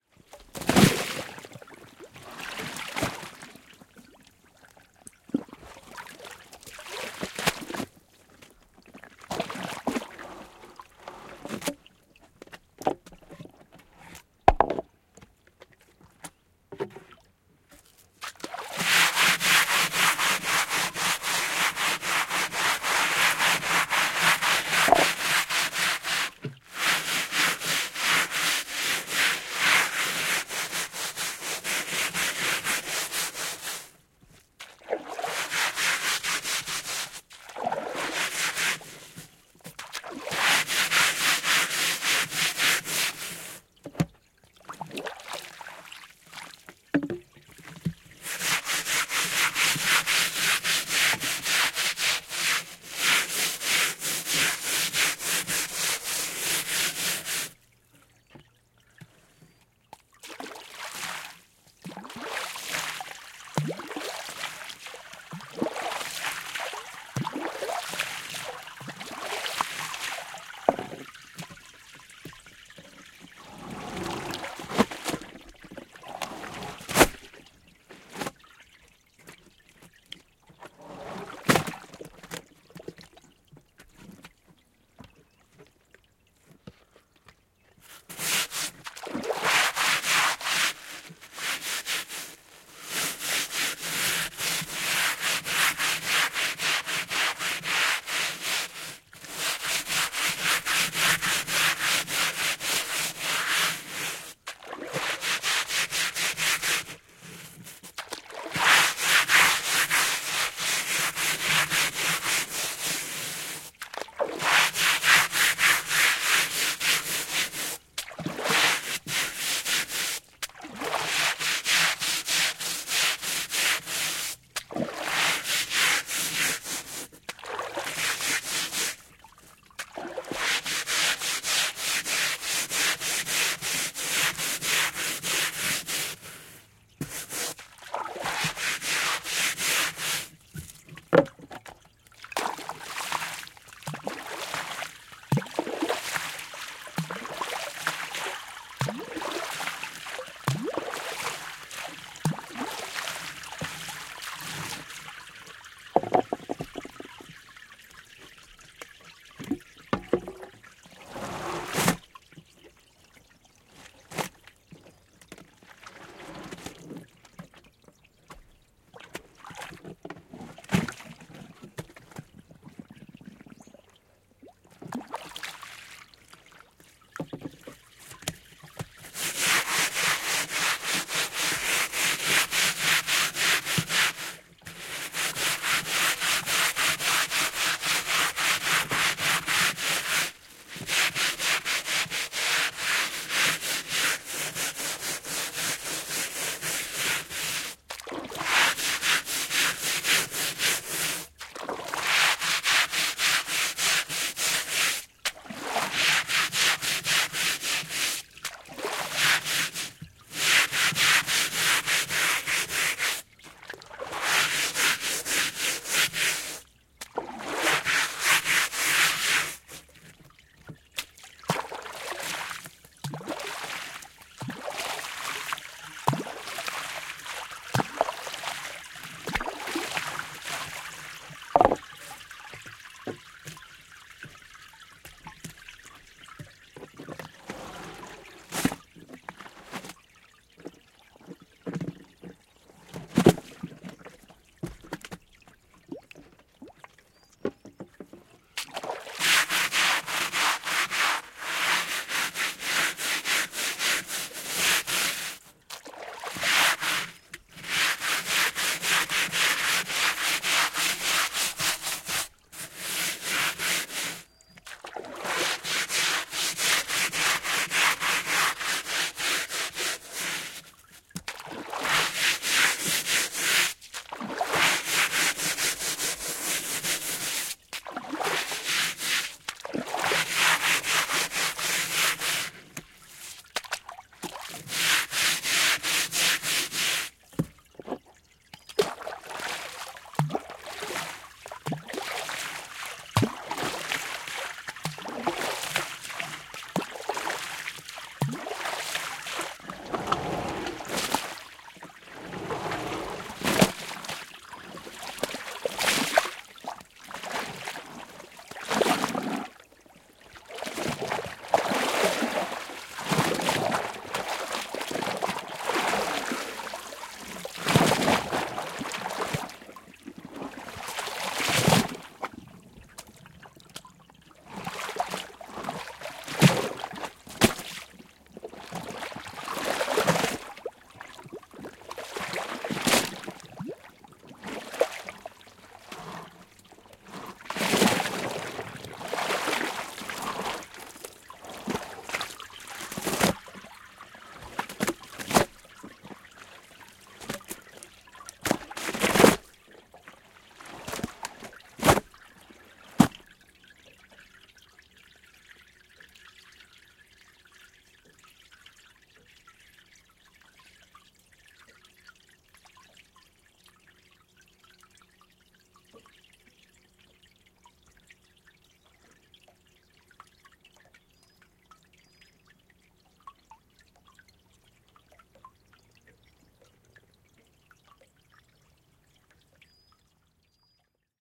Maton pesu laiturilla / Washing a rug with a brush on a wooden jetty on a lakeside, water dripping
Mattoa pestään harjalla puisella laiturilla järven rannalla, vesi valuu, kesä.
Paikka/Place: Suomi / Finland / Lohja, Retlahti
Aika/Date: 18.07.1985